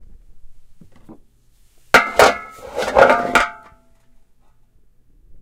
FX Anvil 02
Metal clanking (pitch lowered and used in a scene with metalwork).
impact, metal, strike, clank